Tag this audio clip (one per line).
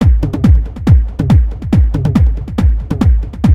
loop
techno